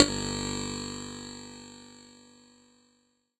ruler on table+coffee machine(edited)
A rule on a table with a sound of a coffee machine overlayed, edited , recorded with a zoom H6
strange, Edited, machine, table, Ruler, OWI, electric